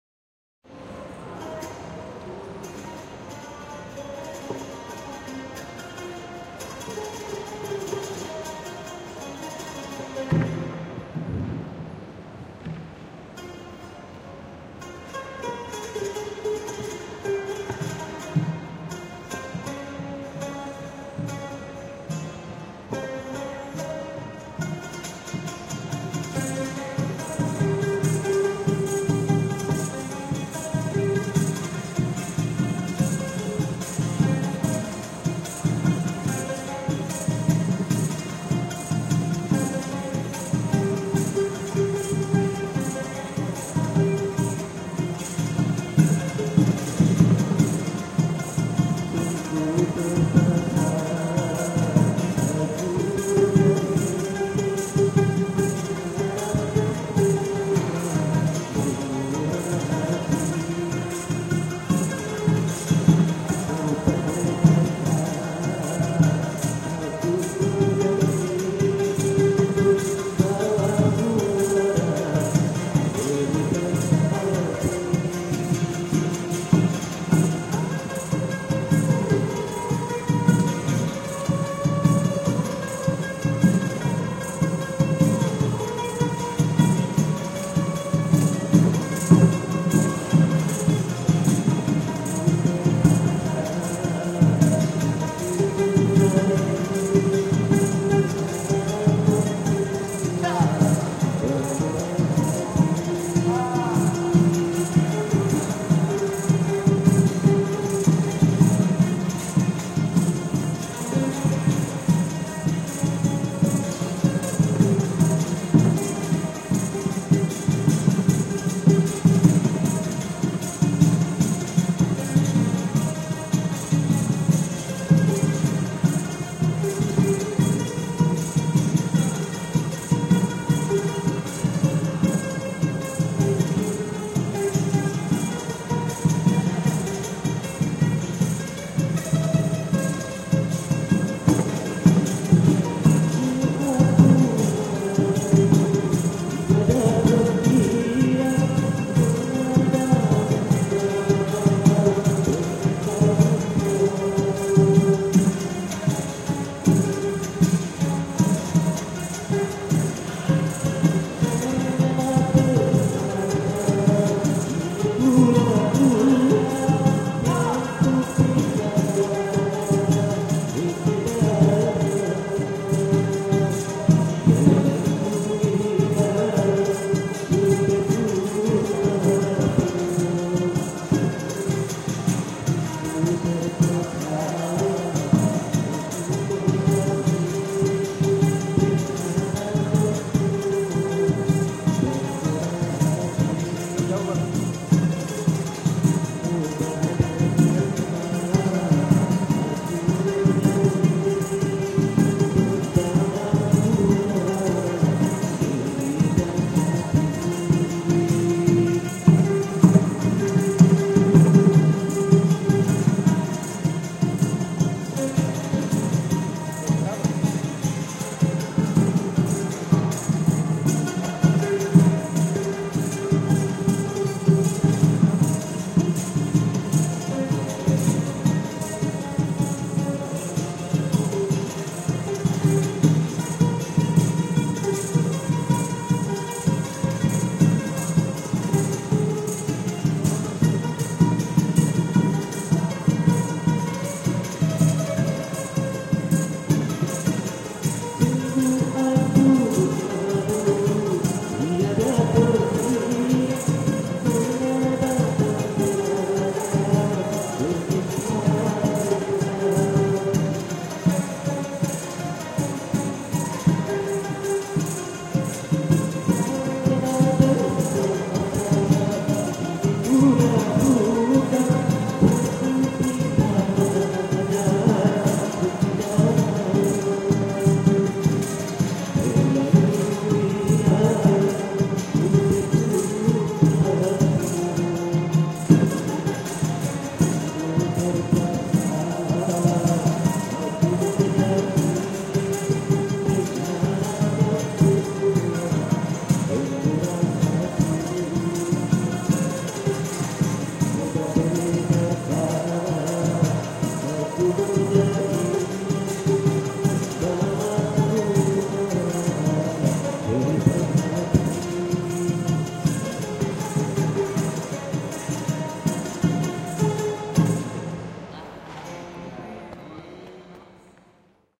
Pakacaping Music 1 - Makassar, Indonesia
Traditional Arab-influenced Pakacaping music recorded in Makassar, Sulawesi (Celebes), Indonesia
akkelong, Arab, Asia, Bahasa, Bugis, Celebes, ethnic, ethno, field-recording, folk, harp, indigenous, Indonesia, instrument, islam, kacaping, lute, Makassar, music, pakacaping, Pakarena, port, singing, stringed, strings, Sulawesi, traders, traditional, vocals